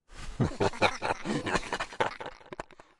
Demon Laugh 2
A more manic, energetic demon laugh.
creature, creepy, demon, demonic, devil, evil, horror, laugh, monster, scary, sinister, spooky